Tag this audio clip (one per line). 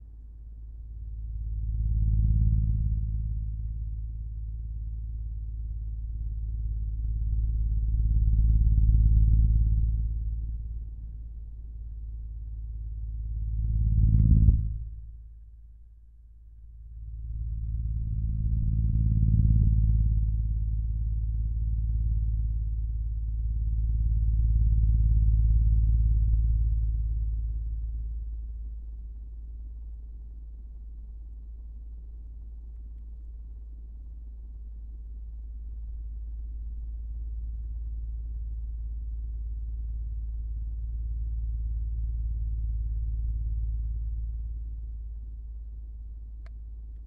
Fan
Spinning
womp